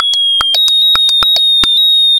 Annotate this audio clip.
110 bpm FM Rhythm -42
A rhythmic loop created with an ensemble from the Reaktor
User Library. This loop has a nice electro feel and the typical higher
frequency bell like content of frequency modulation. An experimental
loop with a strange, high frequency melody. The tempo is 110 bpm and it lasts 1 measure 4/4. Mastered within Cubase SX and Wavelab using several plugins.